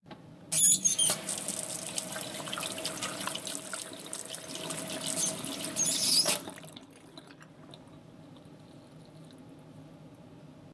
squeaky faucet on off
Squeaking knob as turning water on at kitchen sink
squeak, turning, knob, water, wash, running, kitchen, dishes, tap, off, drain, Squeaky, sink, faucet